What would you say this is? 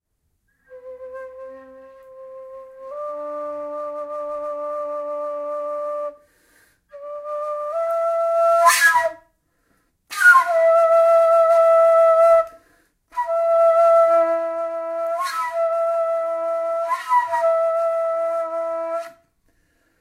Kaval Play 09
Recording of an improvised play with Macedonian Kaval